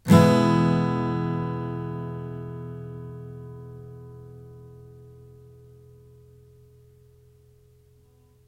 Yamaha acoustic through USB microphone to laptop. Chords strummed with a metal pick. File name indicates chord.
guitar, strummed, acoustic, chord